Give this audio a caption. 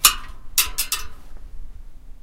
records, oneshot, punch, zoom,